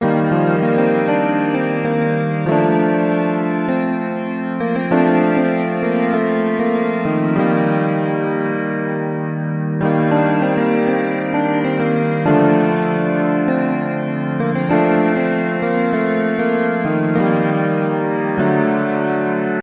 A synth and an e-piano playing gentle, lighthearted chords. 98 bpm.
Chords- E♭, Dm, F, E♭, E♭, Dm, F, E, D